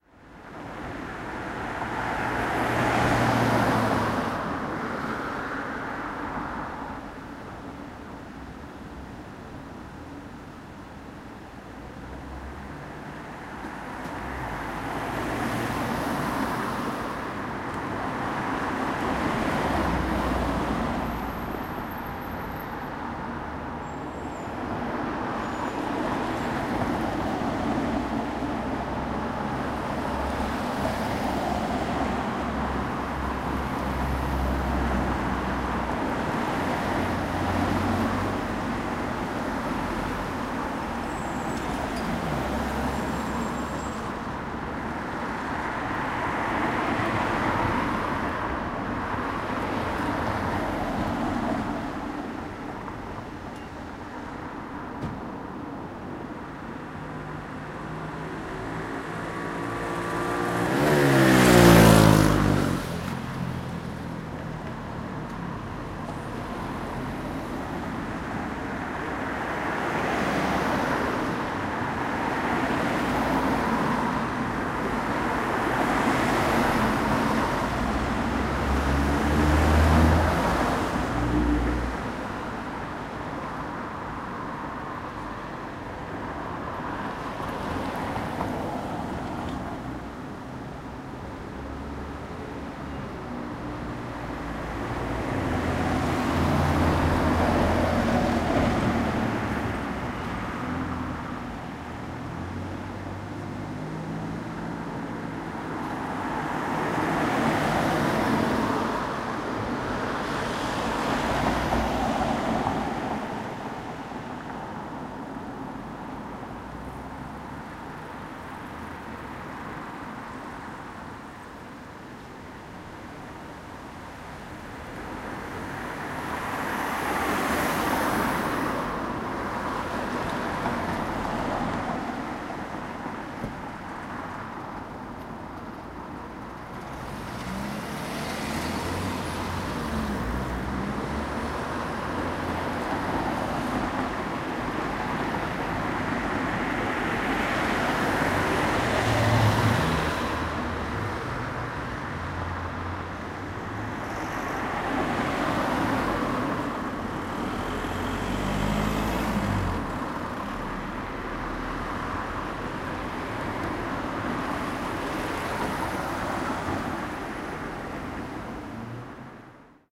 Residential - Traffic - Street - Close Perspective
Ambience, Residential, Street, Traffic